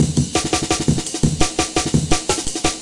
A mangled Amen breakbeat